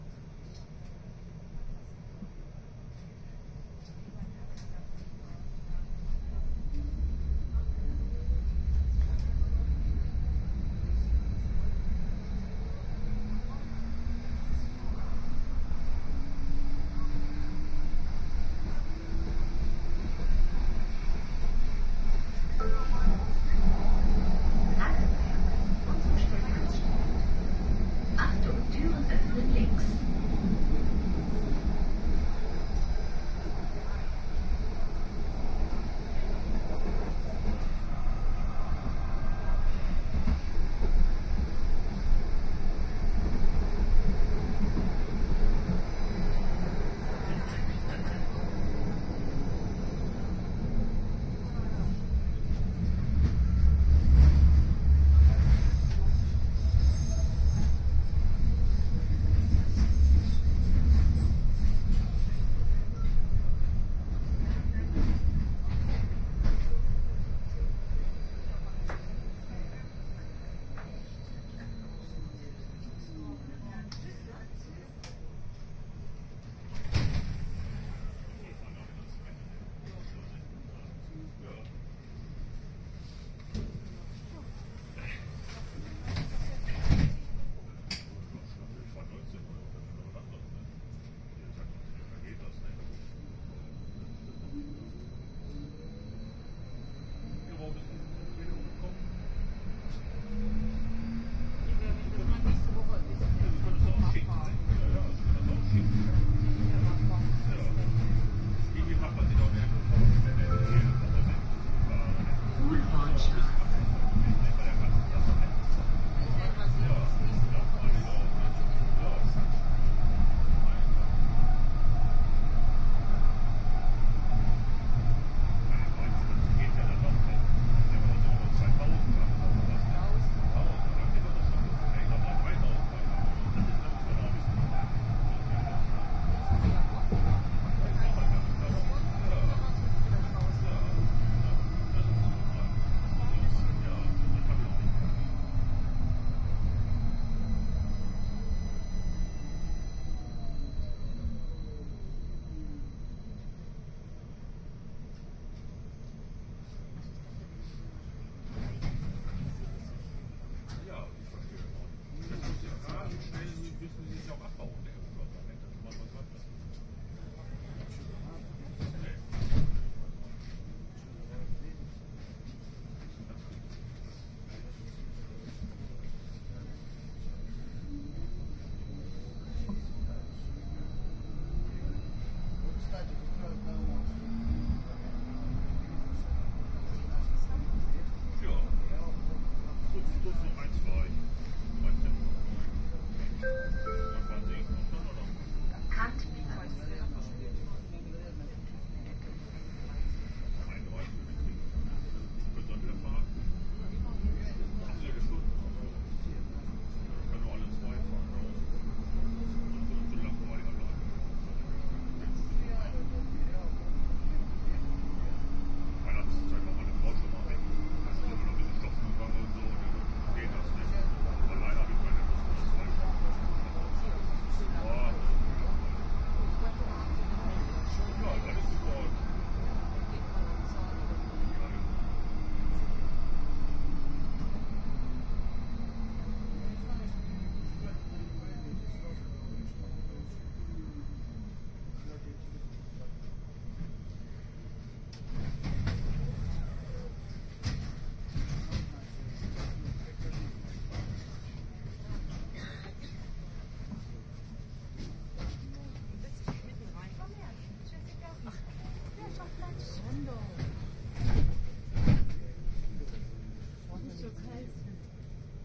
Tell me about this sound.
On the tram in Hanover /Germany. It seems to be the quickest way of getting around in that town, as part of it is underground. What one hears are the sounds of the journey, some announcements and the people chatting. AEVOX IE microphones and iRiver ihp-120.
atmosphere, binaural, field-recording, town, tram, tramway